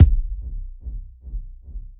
kik5b-wet
noise, hits, techno, samples, kit, drum, sounds, idm, experimental